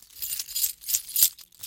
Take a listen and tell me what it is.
Chaves sendo chacoalhadas na mão.
chacoalhando, keys, steel